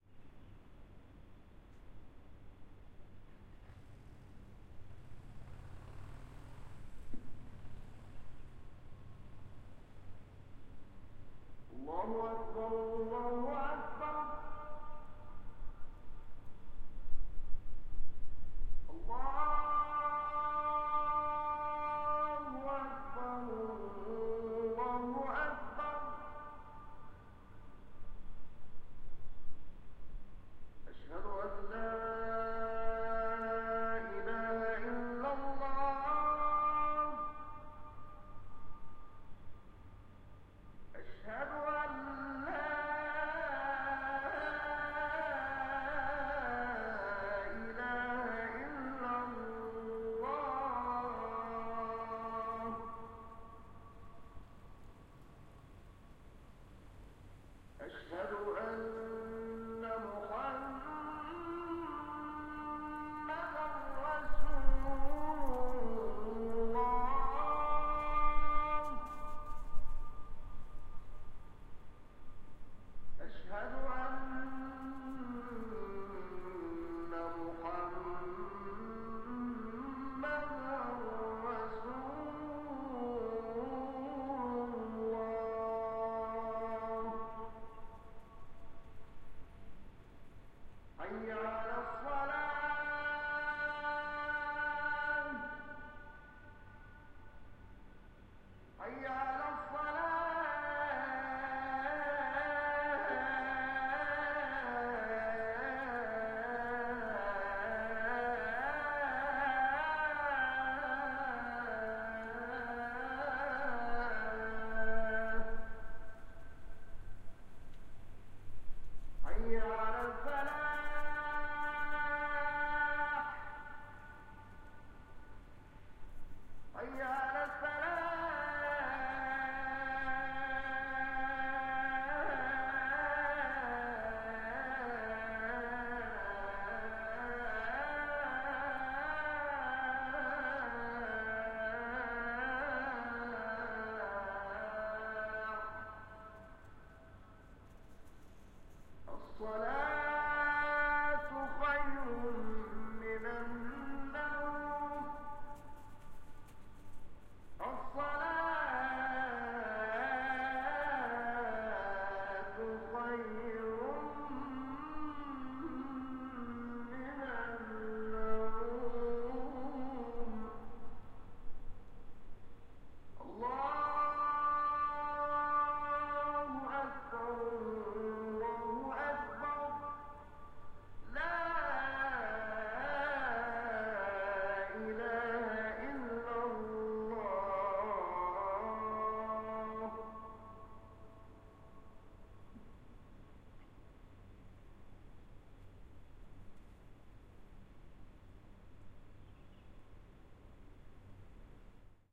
muezzin 5am windy
Another call to prayer. 5am on a windy day, different recording position than last time.
binaural, islam, muslim, arab, muezzin, call-to-prayer, mosque, field-recording